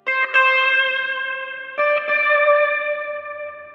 A solo guitar sample recorded directly into a laptop using a Fender Stratocaster guitar with delay, reverb, and chorus effects. It is taken from a long solo I recorded for another project which was then cut into smaller parts and rearranged.
guitar, processed, rock